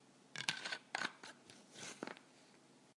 Shutting a bottle turning the bottle cap
bottle, cap, drink, plastic, spinning, water